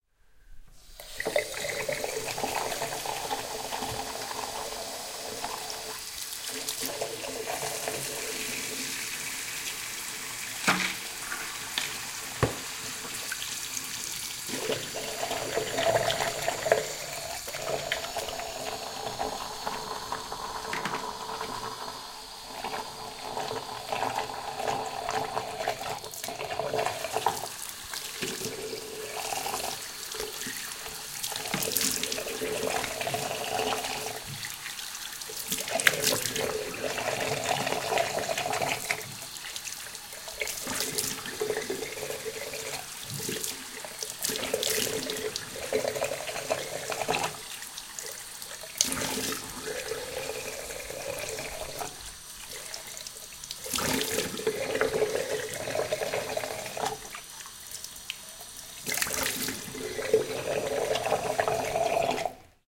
Washing Face in Bathroom Sink Stereo
This is the sound of pre bed-time facewashing. The water was aimed down the plug-hole and you can hear this when the person moves their hands out of the stream. This is a stereo recording.
washing-face, field-recording, bathroom-sink, water